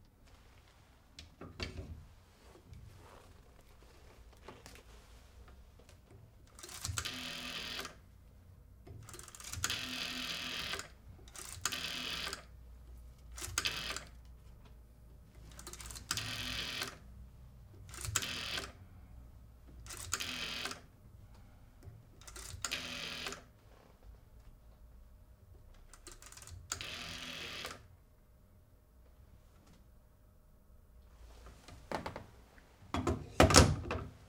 A call from an old rotary phone